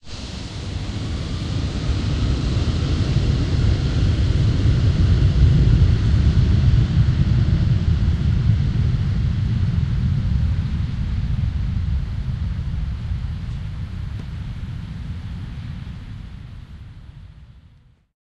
airport5quiet
Jet landing at PBI recorded with DS-40 and edited in Wavosaur.
airport, plane, jet, airplane